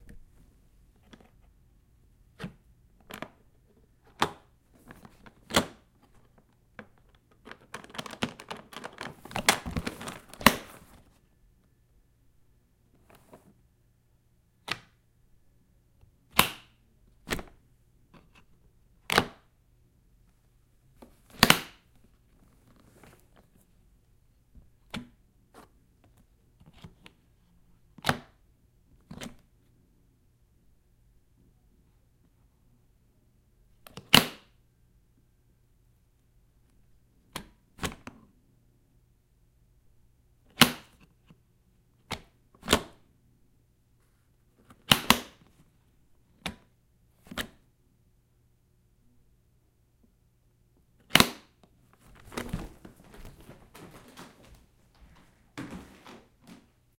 Various sounds of opening and closing the clasps of a Taylor acoustic guitar case.